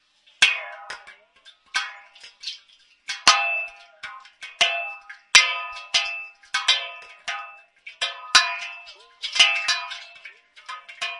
water dabble near a boat
buoy, sea